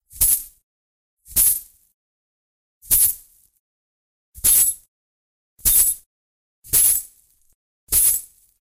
drop bag of coins